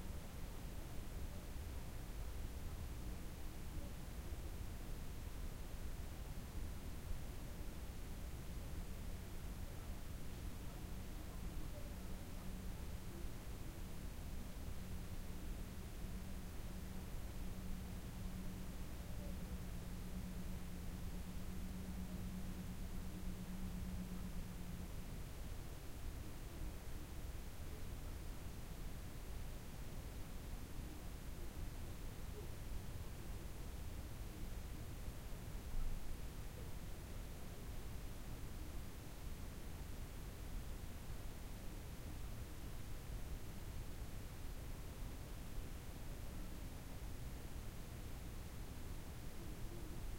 Here is another mysterious recording. PRobably around 9-10pm.
Recorded from my room, windown was open, hoping to pickup any interesting sounds.
The sound is quite faint but can easily be seen in the spectrogram using a program like Audacity. You can easily see it here also using the Spectrogram display in the sample window.
Barely noticeable when played at normal speed.
I was scanning through the recording to check if I had anything interesting, so I was playing it in Audacity at 3x speed. That is when I noticed the dissonant hum. Would not have noticed it if playing at normal speed.
The sound is present from the start of this file but increases in intensity and then suddenly stops at about 25s.
Does not sound like a car/motorbike/train/airplane. I don't know what it is.